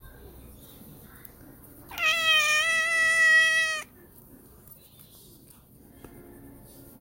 This is a sound that despite being short is heard loud and constant. It was not triggered by anyone, it is the natural meow of a cat.